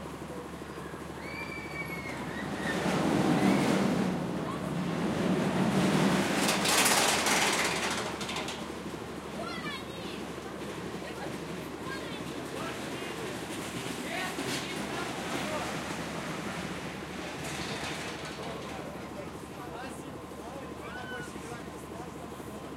Amusement park attraction ride
rides, attraction, park, amusement